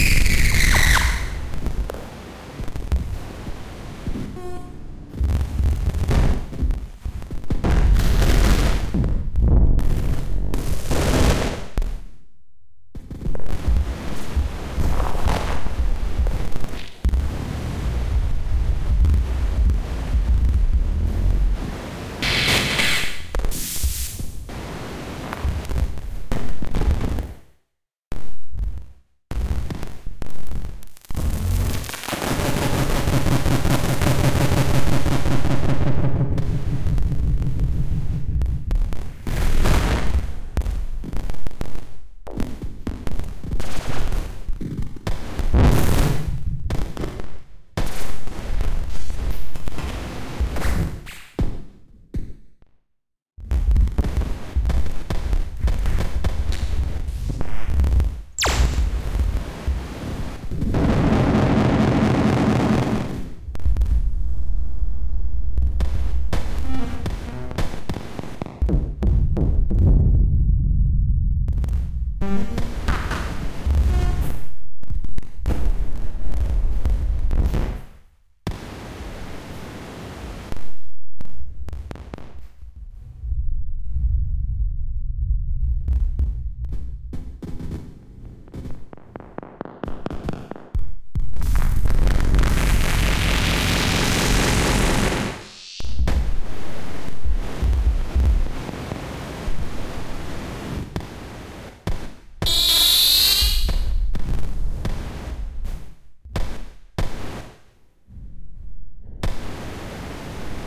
1st version of random patch 64
artificial, random